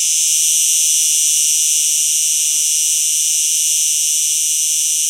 part of the '20070722.pine-woodland' pack that shows the changing nature of sound during a not-so-hot summer morning in Aznalcazar Nature Reserve, S Spain, with trailing numbers in the filename indicating the hour of recording. Sound of cicadas is... unbearable, you risk going crazy. Time to move to another recording location.